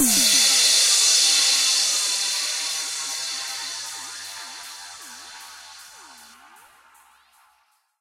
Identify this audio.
i designed these in renoise stacking various of my samples and synths presets, then bouncing processing until it sound right for my use
break, crash, cymbal, cymbals, down, fx, hit, noise, release, sfx, Splash, transition, white